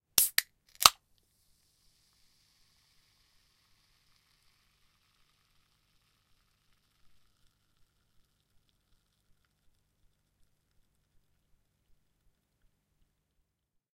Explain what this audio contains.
Raw audio of a 330ml Pepsi can being opened. The initial fizz after opening is also present.
An example of how you might credit is by putting this in the description/credits:
The sound was recorded using a "H1 Zoom recorder" on 14th April 2017.